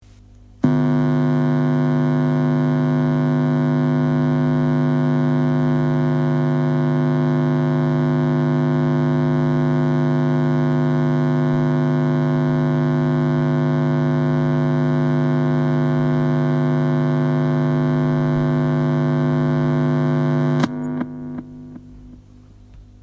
Octave Shift Feedback 1
All sounds made using following equipment:
-Roland M-Cube GX
-XLR cable
-Ibanez GRG170DX electric guitar